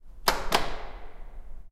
Prison Locks and Doors 22 Handle turn locked
From a set of sounds I recorded at the abandoned derelict Shoreditch Police Station in London.
Recorded with a Zoom H1
Recorded in Summer 2011 by Robert Thomas
doors, latch, lock, locks, London, Police, Prison, scrape, Shoreditch, squeal, Station